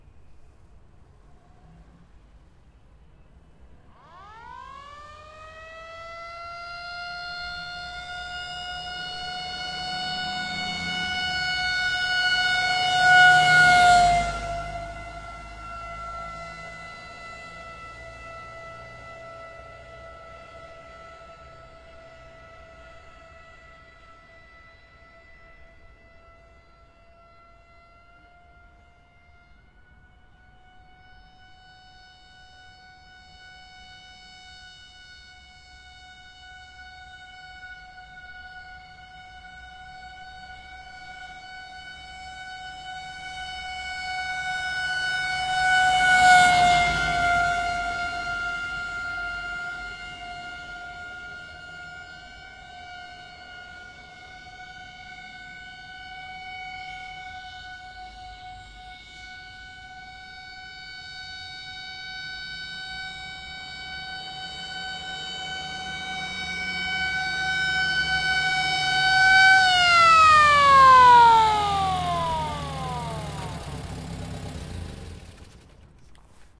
Warsawa vintage Car (1955) pass by with siren CsG
field-recording, vintage, warsawa, passby, traffic, police, siren